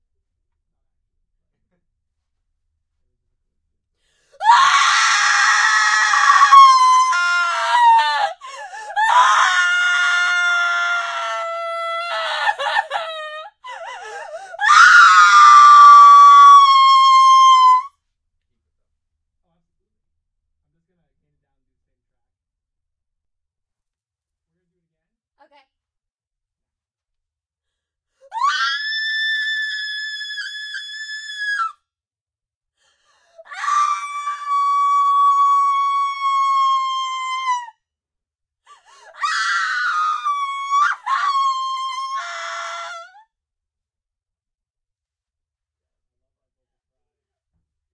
Horrified female scream
Recordist Peter Brucker / recorded 12/5/2018 / ribbon microphone / performer C. Travers